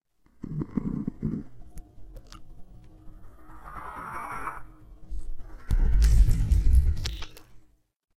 mouth noises (distorted)
breathing and sounds of the mouth slowed down and distorted
slurp, mouth